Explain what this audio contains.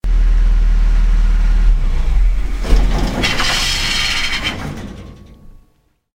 The death-like rattle of an old truck cutting off